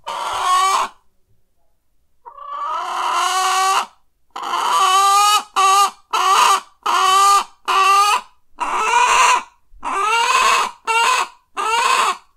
Chicken Wanting 2
A hen demands something
chicken, egg, farm, hen, nest, want